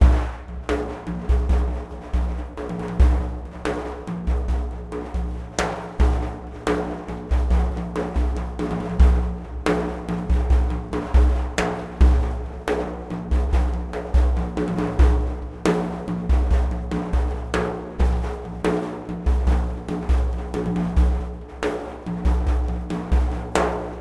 7/8 slow daf rythm with rode NT4 mic, presonus preamp